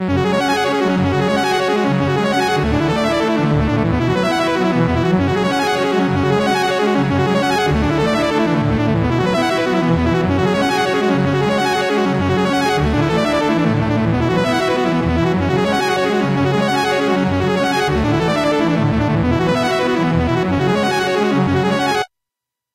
digital arpeggio
An arpeggio synth phrase in F#m. This was used in one of my compositions and the chord structure is F#m, Dm, Em. Pretty simple.
arpeggio, electronic, minor, music, synth, synthesizer